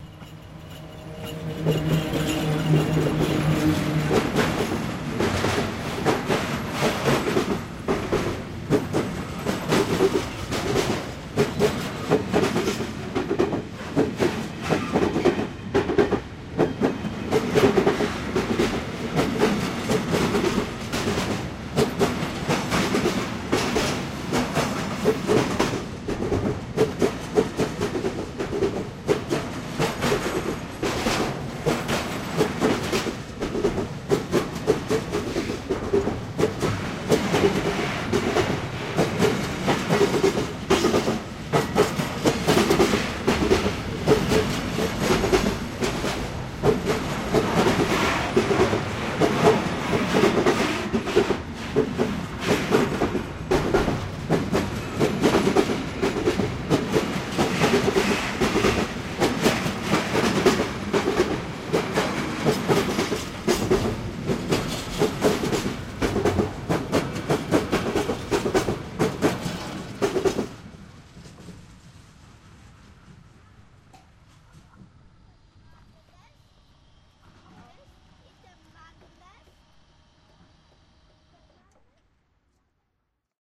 13.10.2010: freight train field recording send to me by one of my Sound City project fans. recording made on Romana Dmowskiego street in Poznan.